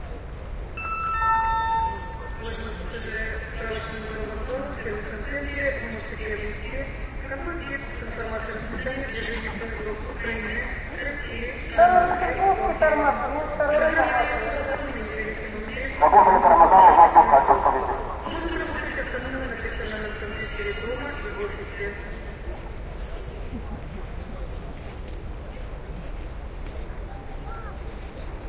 21-donetsk-train-station-nigh-random-announcements
In the same donetsk train station we can hear an annoucement, then another one mixing with it. Typical reverberation from bad speakers hung all over the place.